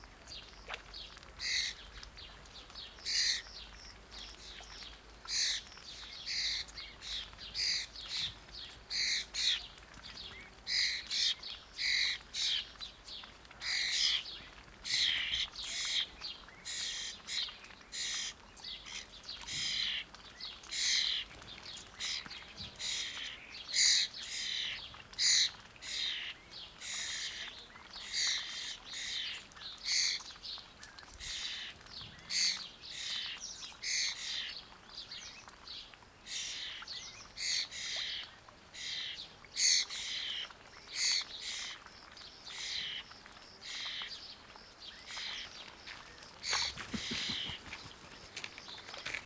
20090628.unknown.call.01
calls from an unseen bird, quite close. Recorded near Centro de Visitantes Jose Antonio Valverde (Donana, S Spain) using Sennheiser MKH60 + MKH30 > Shure FP24 > Edirol R09 recorder, decoded to mid/side stereo with Voxengo free VST plugin
ambiance, marsh, birds, field-recording, nature, donana, south-spain